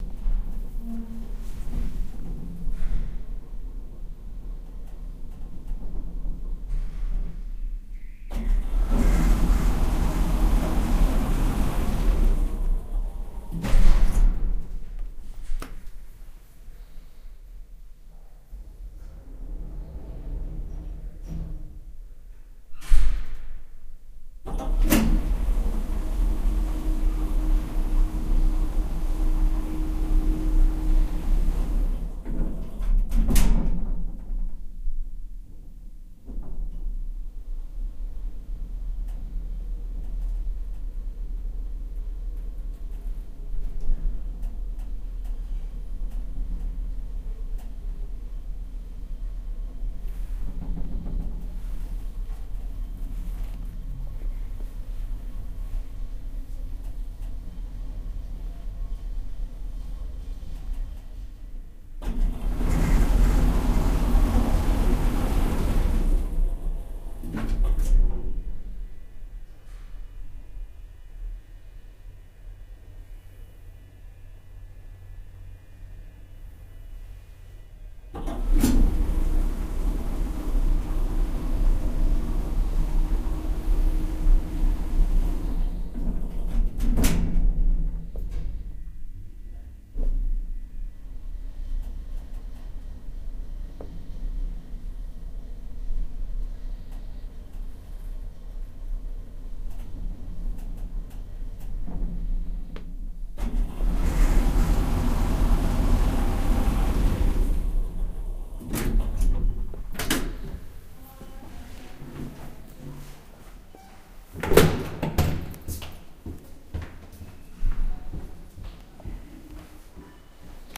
lastenaufzug kassel 1
freight elevator, theatre Kassel, recorded with edirol R-09 and OKM II stereo microphone
field-recording, freight-elevator, kassel